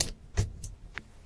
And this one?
Blood splat 005a
I had a sound request for a 'bloodsplat'.
Created these sounds by throwing small portions of water or a wet sponge.
Recorded with a Zoom H1.
Edited in Audacity. EQ and noise reduction used as needed.
death, mayhem, bloodsplat, gore, splat, foley, blood